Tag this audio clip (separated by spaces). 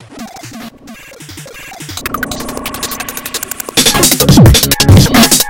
c64 ambient drums